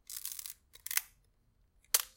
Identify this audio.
Old Konica C35 camera charging and shooting
photography, shutter, slr, photo, Konica, camera